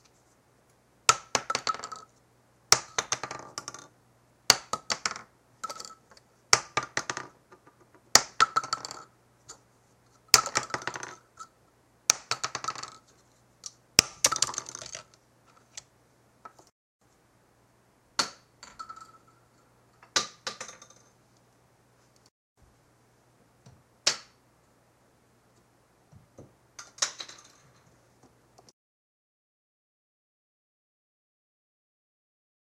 Shotgun shell ejection
used shotgun shells falling onto a hard surface.
I recorded this sound myself using the mic on my computer and some empty shotgun shells I found by simply dropping them on the floor.
action, casing, ejection, fire, shell, shotgun, war